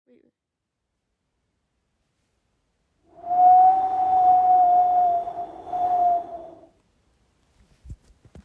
132 Wildwood Rd
My friend Dylan making a sound that sounds like wind or maybe a train
friend, train, Wind